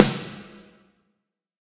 HAIR ROCK SNARE 001
Processed real snare drums from various sources. This snare sample has lots of processing and partials to create a huge sound reminiscent of eighties "hair rock" records.
drum, real, sample, snare